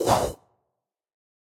wind swish swoosh 2
A kind of "wooshy" wind sound, used in my gifleman cartoon when the hero makes fast moves
air, swhish, wind, woosh